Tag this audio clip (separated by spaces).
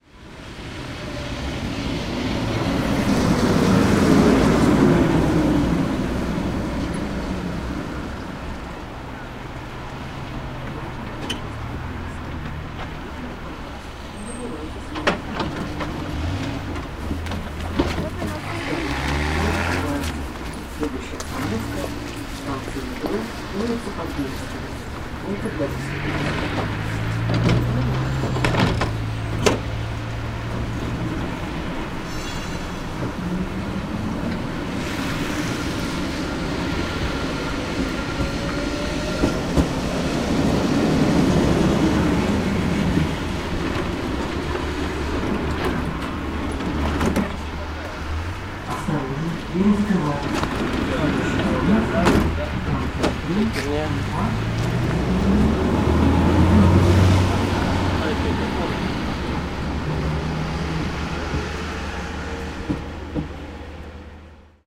street tram tramway city russia streetcar transport field-recording traffic